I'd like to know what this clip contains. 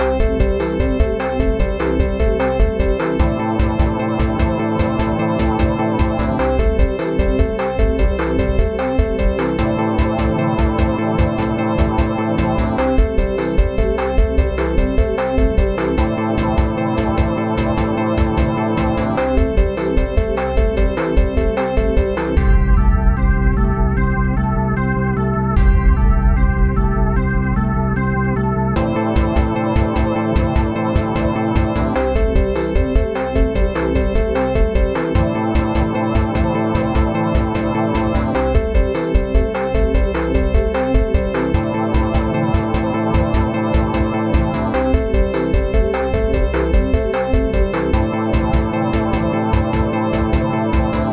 Catchy Piano-Base-Drum Song (HEADPHONES)
This is just a catchy song with a nice bridge in the middle, so wait until the middle!!!!! It's best with headphones on, since you can actually hear the base.
Thanks!
base, organ, drums, Piano